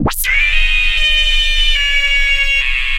sonokids-omni 12
abstract, analog, analogue, beep, bleep, cartoon, comedy, electro, electronic, filter, fun, funny, fx, game, happy-new-ears, lol, ridicule, scream, sonokids-omni, sound-effect, soundesign, synth, synthesizer, toy